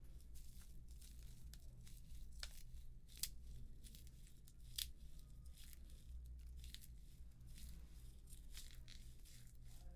2- wind bush
wind bush sound